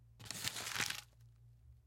Paper Bag and Bottle Wrapping FF384
wrapping, paper, Crinkling, bottle